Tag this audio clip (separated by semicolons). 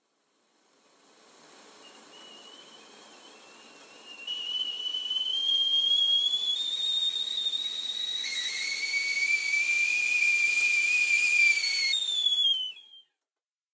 boil; boiling; hot; kettle; kitchen; making-coffee; pot; steam; stove; tea; teapot; water; whistle; whistling; whistling-teapot